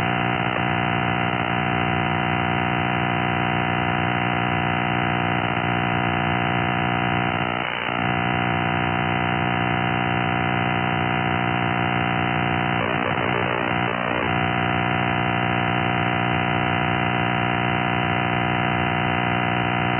Some sort of signal that seems to be jamming WWV on 5 MHz.